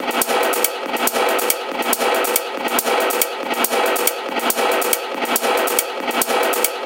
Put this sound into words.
Space Tunnel 2
beat, dance, electronica, loop, processed